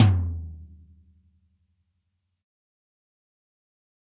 Dirty Tony's Tom 14'' 062
This is the Dirty Tony's Tom 14''. He recorded it at Johnny's studio, the only studio with a hole in the wall! It has been recorded with four mics, and this is the mix of all!
punk, raw, metal, heavy, 14x10, 14, realistic, real, pack, drum, tom, drumset